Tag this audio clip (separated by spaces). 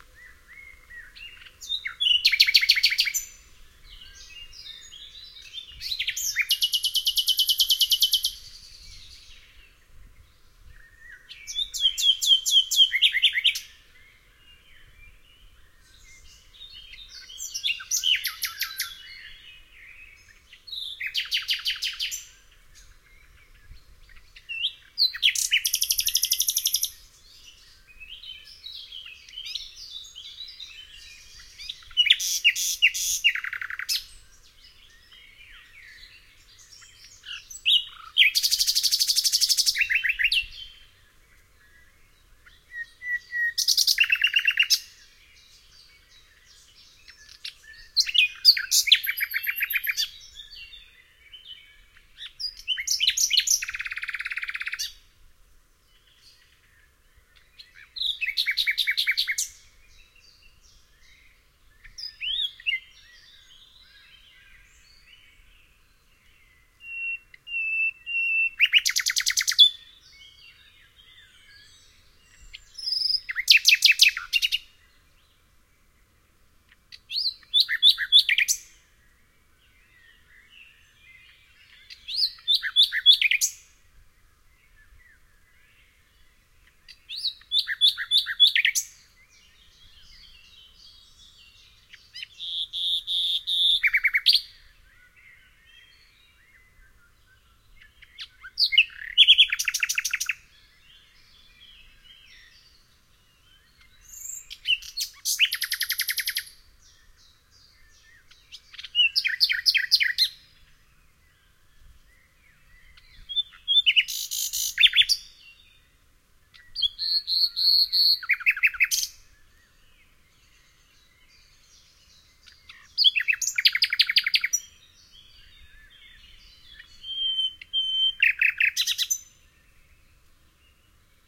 bird birdsong field-recording forest nature nightingale spring